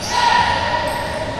Noisy curt sound obtained by dropping the ball into the parquet flooring in a sports hall.
basketball, shout, vocalic, sport, TheSoundMakers, UPF-CS13